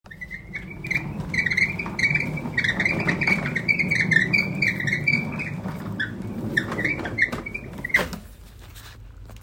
Squeaky mop bucket
wheels, Squeaky, plastic, bucket